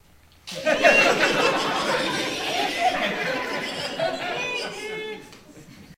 Snickering Crowd
Recorded with Sony HXR-MC50U Camcorder with an audience of about 40.
audience, snickering, crowd, mob